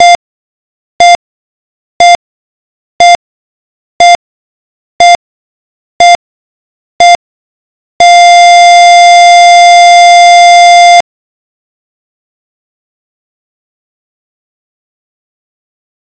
beep beep beep beeeeeeeeee
a simple heartbeat of a dying person. Made in LMMS with BitInvader.